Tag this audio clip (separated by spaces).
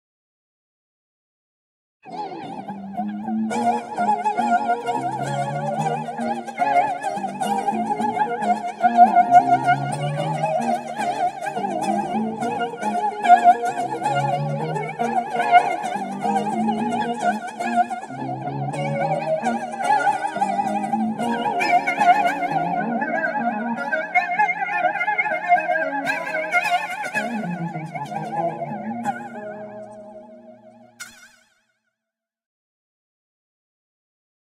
field-recording funk-guitar-jam sound-design